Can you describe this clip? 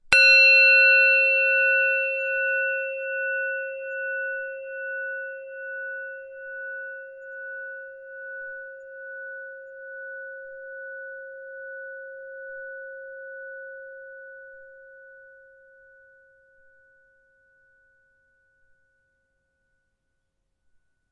A hit of my tibetan singing bowl.